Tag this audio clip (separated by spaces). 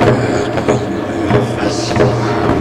loop concrete electronic vocal atmosphere baikal